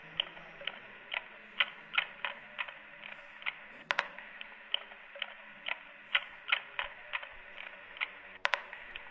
Human Bike Sound Archive.
Megaphone toy recorder filtering mechanical sounds of bicycles.
mechanic, bell, cycle, metallic, bike, bicycle, horn, megaphone-toy